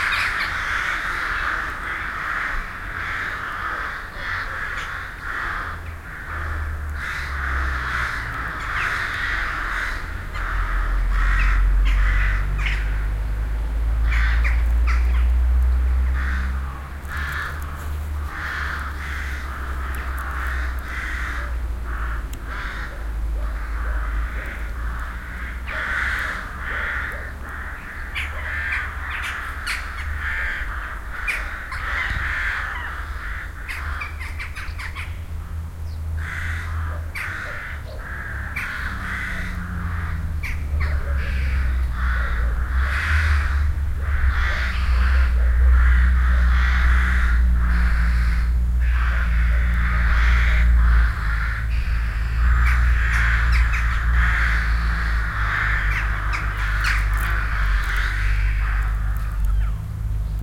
This recording was one of the first I did, using the Soundman OKM II and the TCD-D7 portable DAT recorder, way back in August 1994 in Kenmore, Scotland.
It seems, there were more crows about then. A car is heard in the background.
crows, binaural